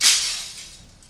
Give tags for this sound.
glass smash field-recording broken

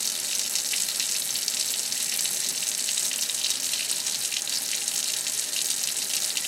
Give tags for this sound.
kitchen running-water sink water

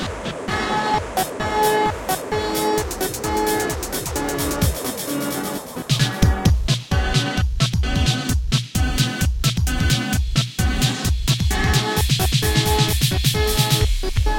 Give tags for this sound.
Filter Gated Drumloop Beat

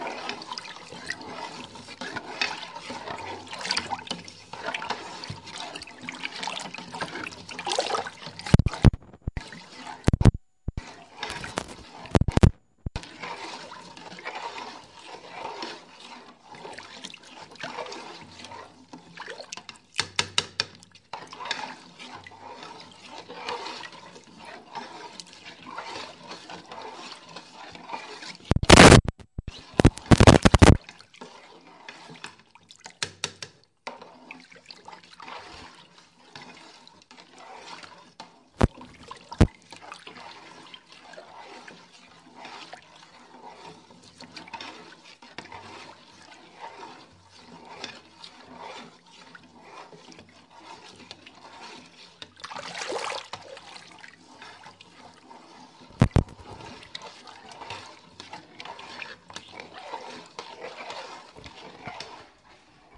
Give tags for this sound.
kitchen pan pot

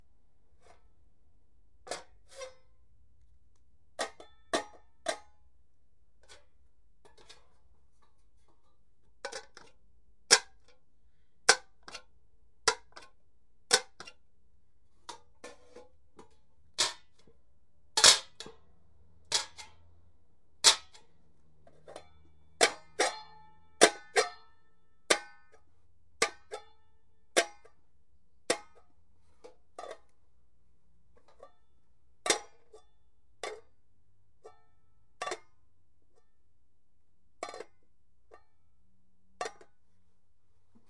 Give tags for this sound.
clack cooking pan kitchen plate

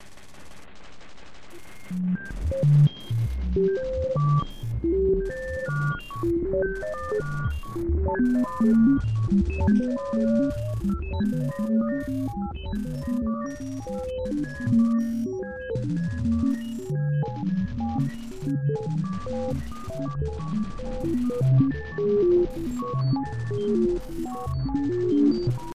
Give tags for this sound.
ambience sequence soundscape trance

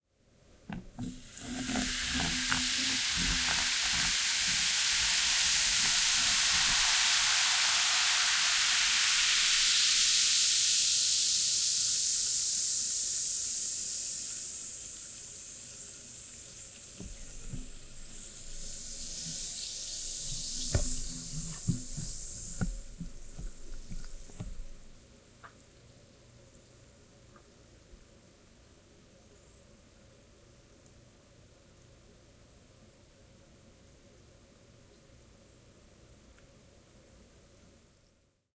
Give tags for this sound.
fizz hiss

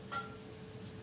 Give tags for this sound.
light electricity fluorescent pop flicker lighting

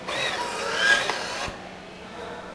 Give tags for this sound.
desinfectant-dispenser shopping-mall technology

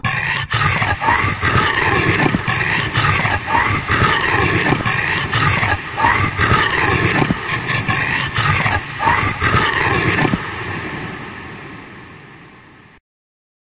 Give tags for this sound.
cocina cuchillo cut foley percussion SFX texture

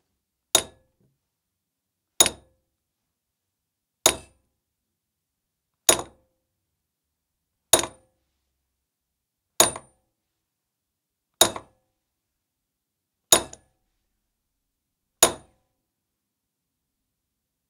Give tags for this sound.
hammering banging construction hammer Metal

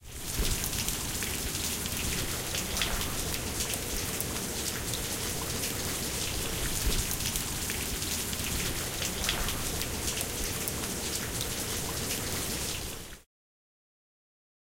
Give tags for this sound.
rain soft water weather

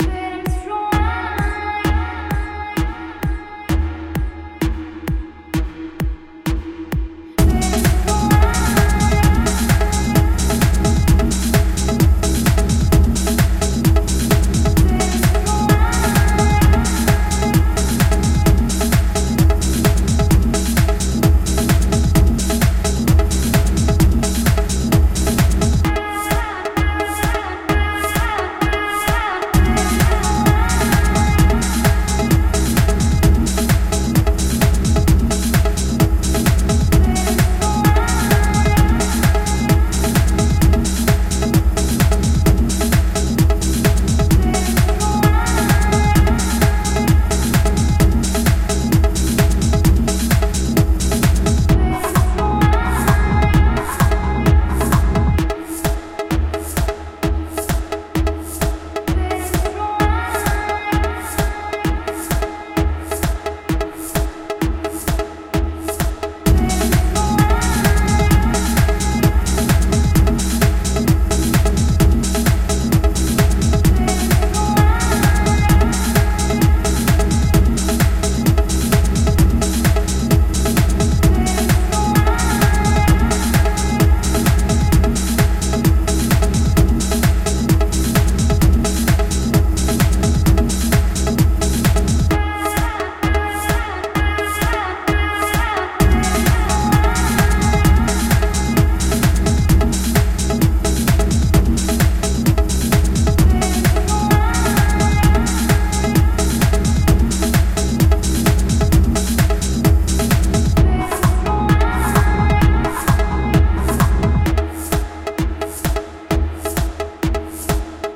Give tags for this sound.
original track electronic loop synth electrobass

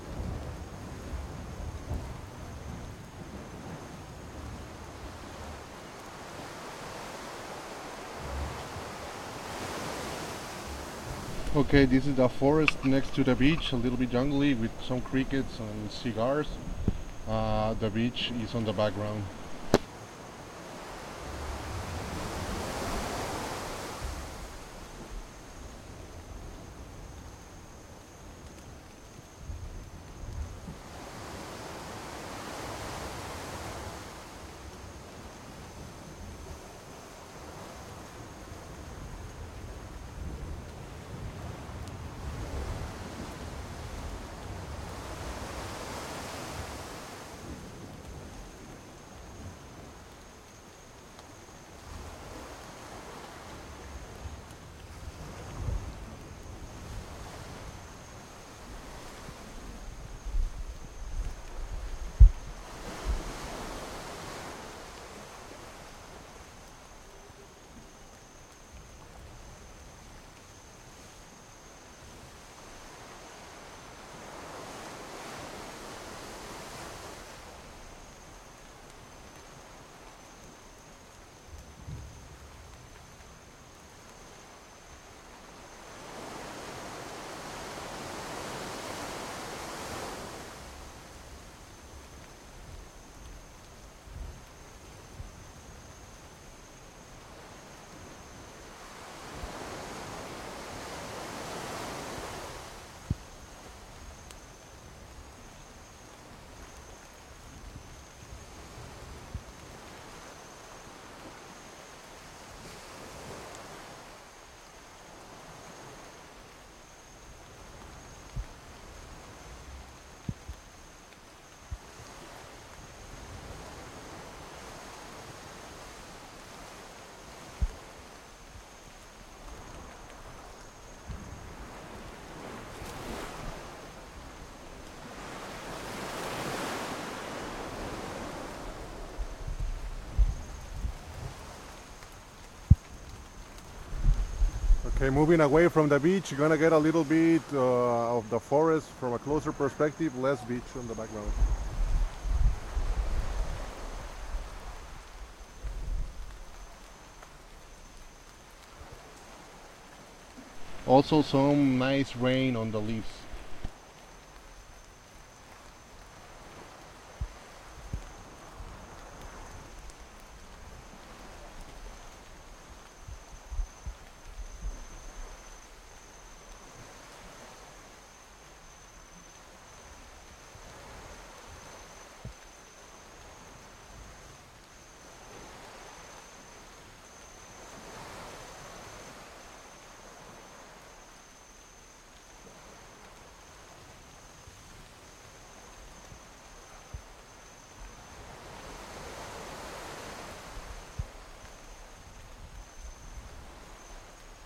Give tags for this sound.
ambiance ambiente amvient arboles beach birds bosque coast field-recording forrest meadow nature olas pajaritos playa pradera sea trees viento waves wind

